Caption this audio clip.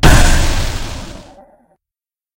rocket fire
game, games, sounds, video